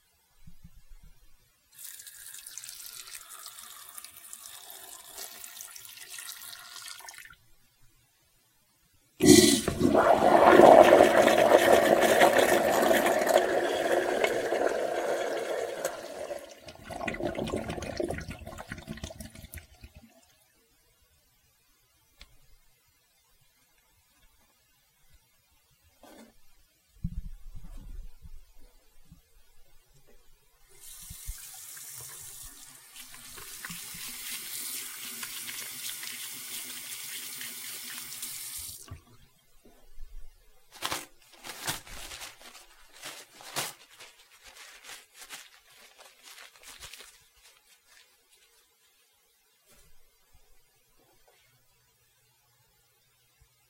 A trip to the bathroom at work. Ran stupid noise reduction and only had laptop speakers so didn't undo... it is what it is.
reduction, urinal, noise